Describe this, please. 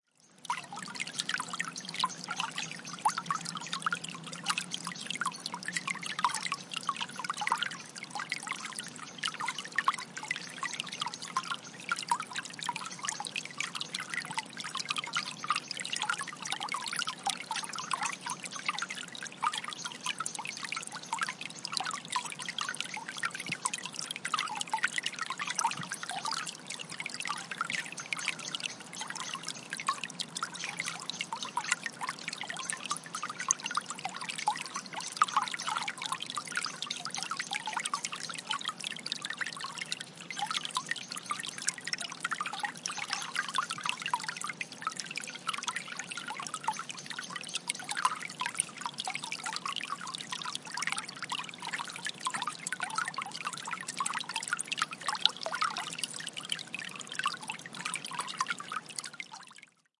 Small stream in forest
For this sound recording I held my microphone 10 centimeters above a small stream in the forest to get the clearest sound.